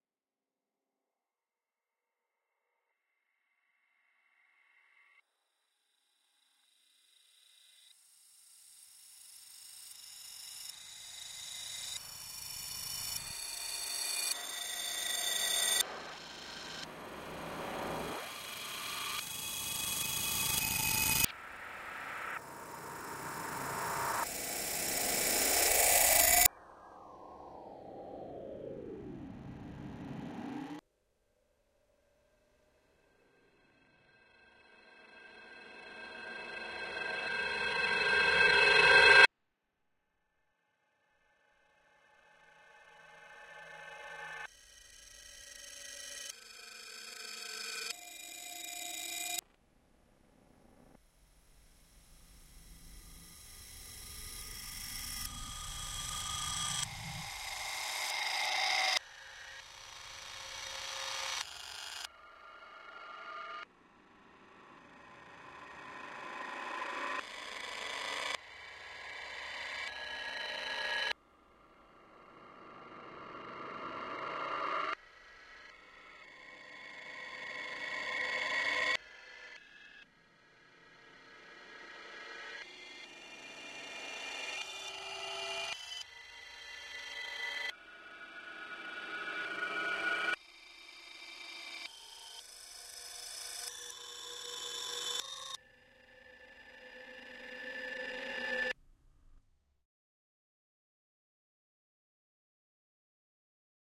03 barreau bunker space echo reverse
strange sound design. Second step of processing of the bunker bar sample in Ableton. reversed the previous sample and maybe (but I don't think so) added some effects.